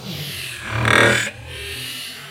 Grained Pans 001B
You can rarely go wrong with granular processing.I have taken some percussion sounds from hitting pots and pans and put them through a granulator VST plug in (KTG Granulator).This sound was a cut from a larger file. The sound build up from a harmless drone to the point of clipping, just before I tweaked the controls on the plugin to tame it again to a low volume drone.
pan, pans, granulated, pots, kitchen, granular, processed, metal, clank, clipping, percussion, pot, metallic